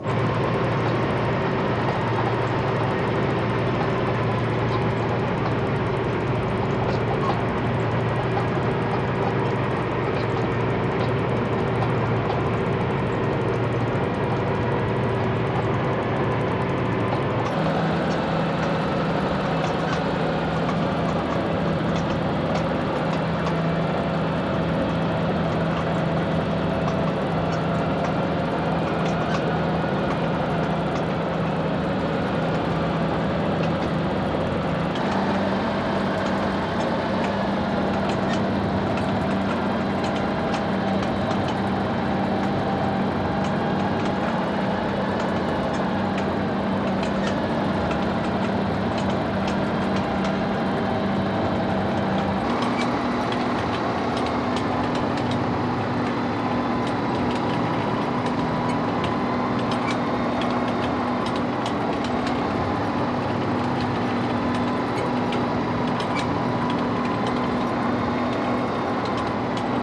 FX Tank Mix 1 Speeds-1234
mixture of mechanical sounds. motors clicks and squeaks.
inspired by military tank
motorized, machine, tank, mechanical, squeaky, rolling